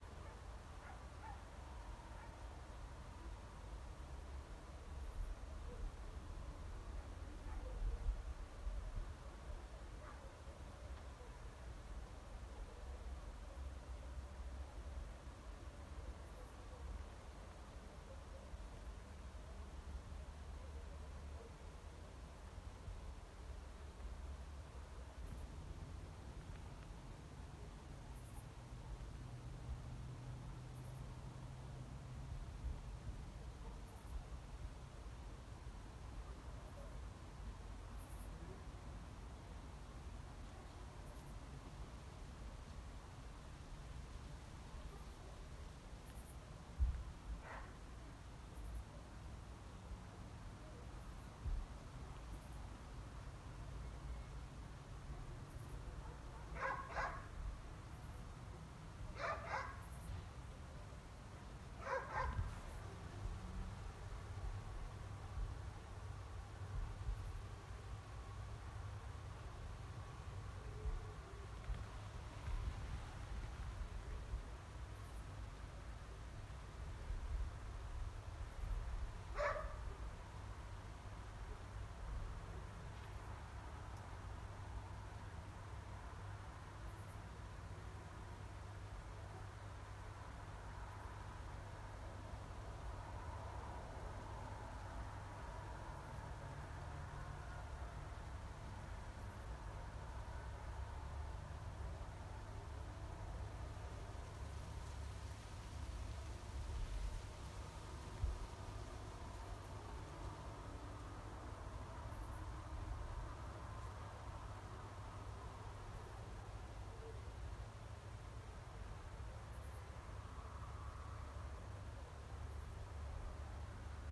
Foley, Street, Late Evening, Crickets, Dog
Ambience, Atmosphere, Barking, Crickets, Dog, Evening, Street, Village